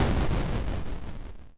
Simple retro video game sound effects created using the amazing, free ChipTone tool.
For this pack I selected the BOOM generator as a starting point.
I tried to stick to C as the root note. Well, maybe not so much in this one..
It's always nice to hear back from you.
What projects did you use these sounds for?